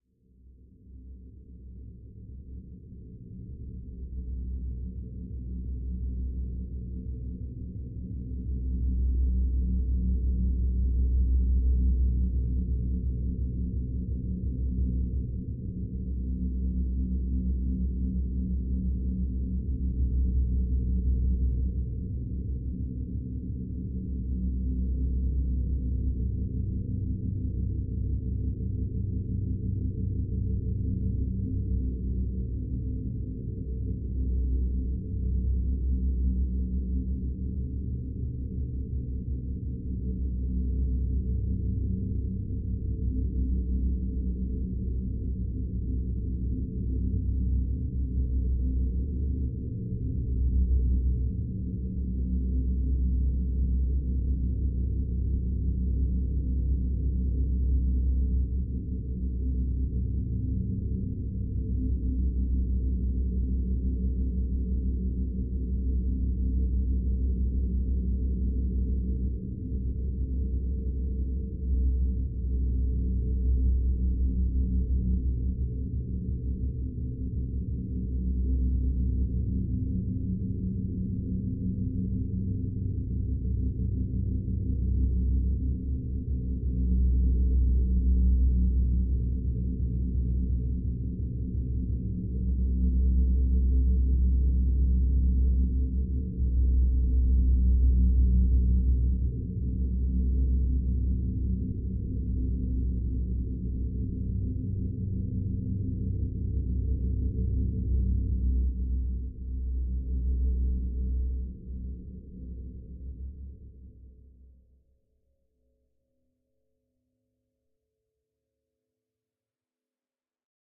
drone "underwater" (sfx)
low drone that was created by processing a toy engine recording.
coil pickup->PCM M10-> DSP.